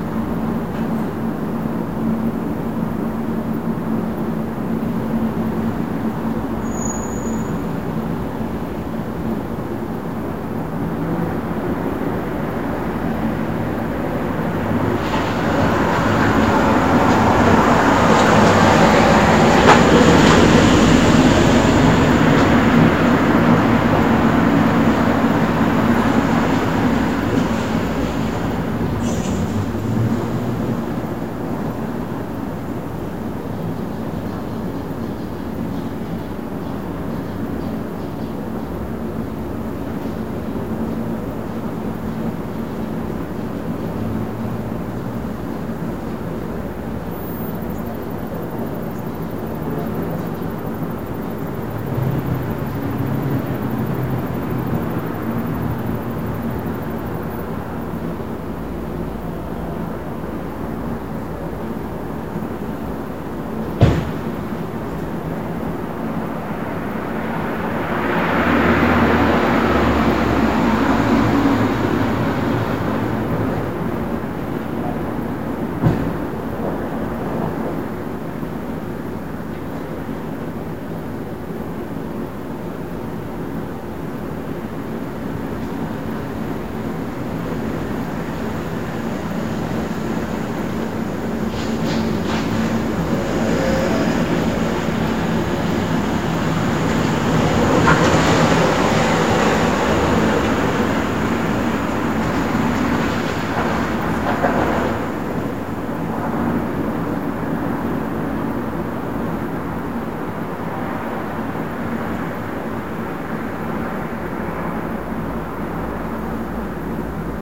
Street atmosphere 08-2015
Evening city atmosphere. Recorded with iMic software.